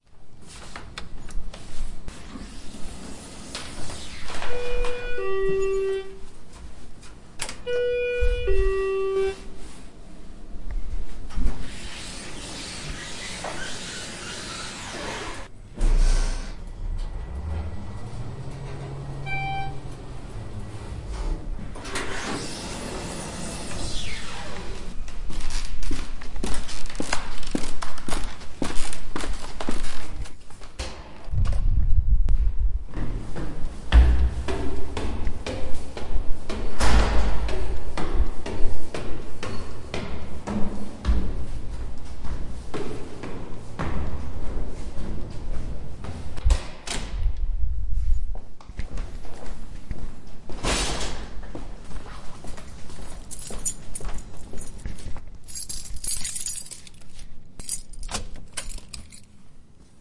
A one minute walk through an old factory building in Reading, PA converted into an arts center called GoggleWorks (the factory made safety goggles among other things). Sounds include footsteps on creaky floor, elevator ride, climbing metal staircase. Recorded with Tascam DR40.